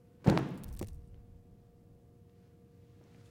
object falls
Heavy bundle (linen) dropped near microphone on concrete floor. With imagination, it could sound like a body falling to the ground.
Recorded with AKG condenser microphone M-Audio Delta AP
crash, foley, thud